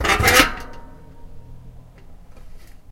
records, oneshot, punch, zoom,
oneshot, punch, records, zoom